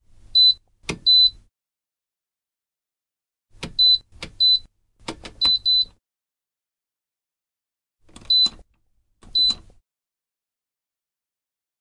19 - Washing machine, seting
Senting of washer. (more versions)